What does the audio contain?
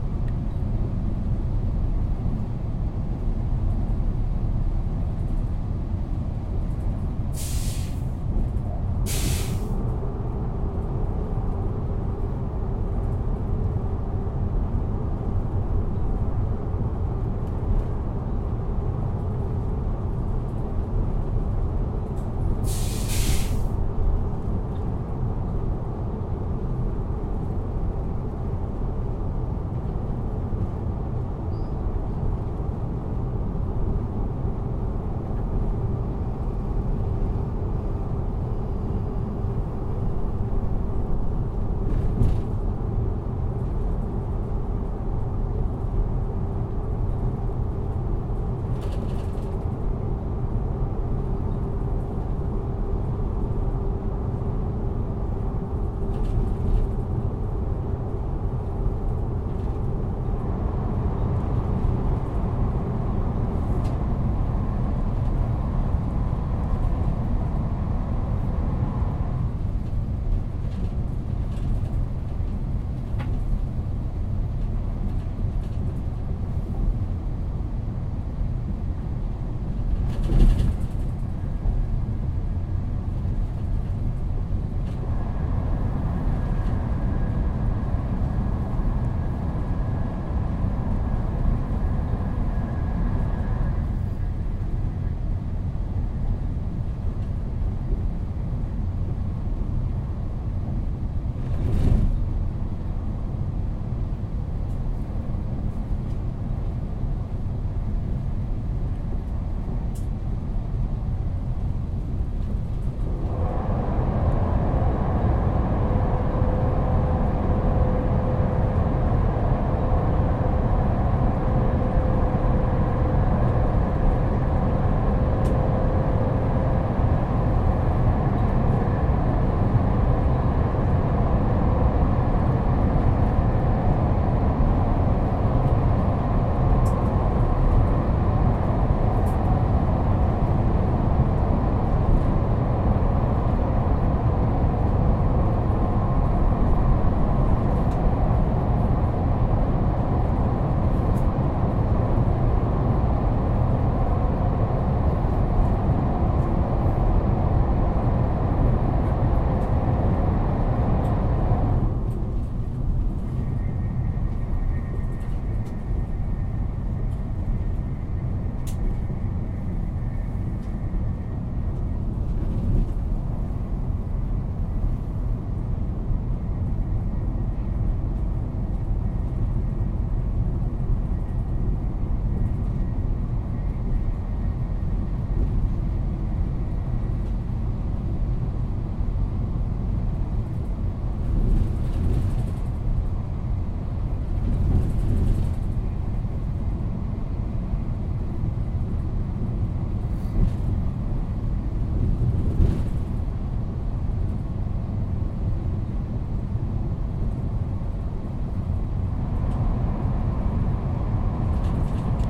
Train ride (inside the car)
Recording made from inside a train carriage. The usual noises of the wagon are heard and a tunnel is passed through.
Recorded in stereo with a Zoom H4N handy recorder.
Movement, railway, Train-ride, tren, tunel, viaje, Wagon